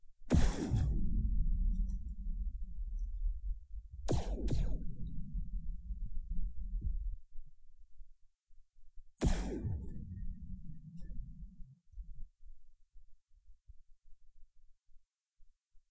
A 3 pulse laser with a lower volume.
I used Audacity to remove the background noise, so it's a bit cleaner that the other lasers. Made with a metal Springy. Recorded indoors with Zoom H4.